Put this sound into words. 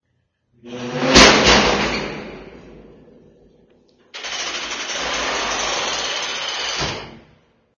sound while opening the big metallic door of a warehouse
opening, warehouse